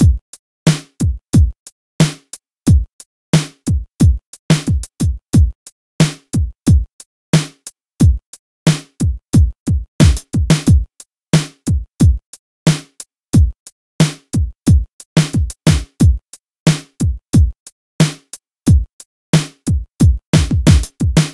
Drumloop - Basic & Variation (8 bars, 90 BPM)
Basic hiphop beat with some variations in even bars.
Made with HammerHead Rhythm Station.
drumloop, hip-hop, hiphop, long, phrasing, rap